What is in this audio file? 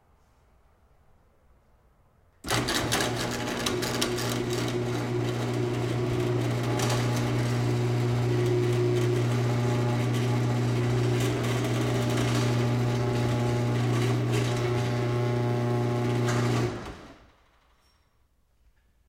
Closing automatic garage door
Closing my automatic garage door from inside of garage minus sound of remote button clicking.
close, door, garage, shut